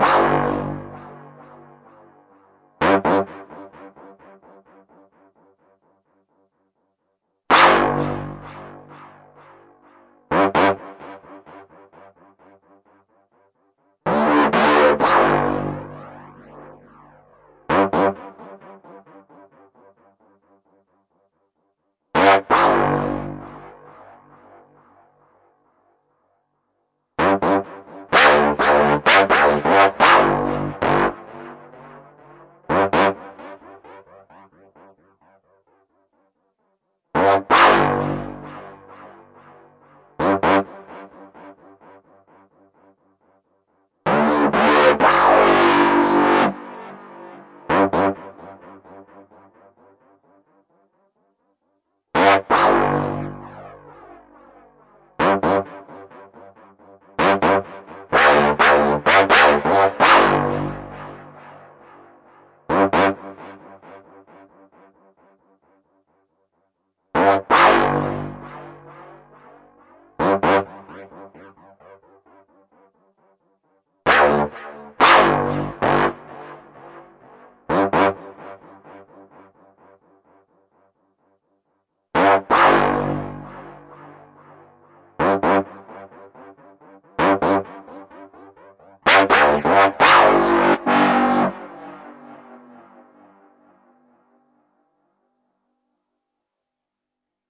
This is the synth hook of one of my tracks (named 'second delight')
recording is done through the access virus ti usb interface with ableton live sequencer software.